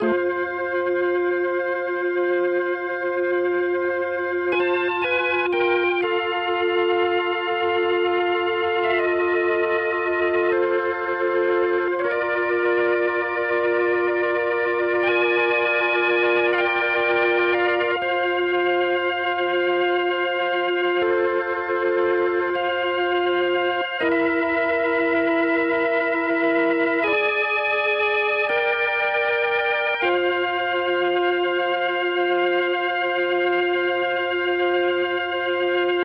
Song5 ORGAN Fa 3:4 120bpms
120, beat, blues, bpm, Chord, Fa, HearHear, loop, Organ, rythm